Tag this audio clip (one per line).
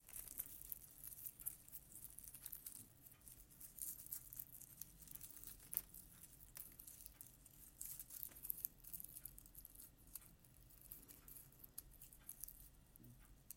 biologic
crack
crackle
eggs
organic